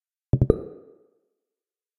Scrolling Sound
UI sound effect. On an ongoing basis more will be added here
And I'll batch upload here every so often.
UI, Scrolling, Third-Octave, Sound